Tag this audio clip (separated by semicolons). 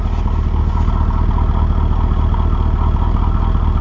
1979; Firebird; Muffler